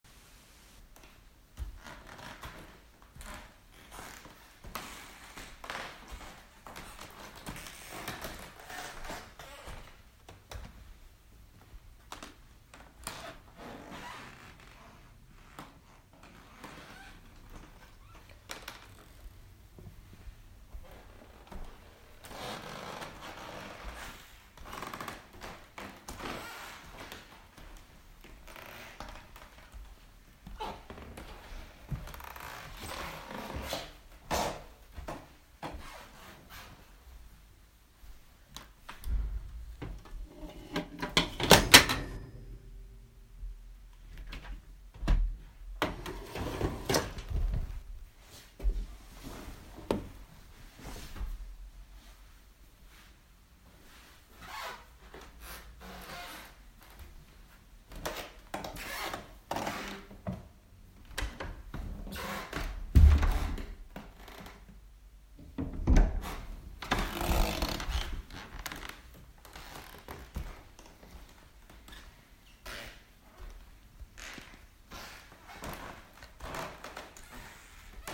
recorded in a house in Austria steps and doors cracking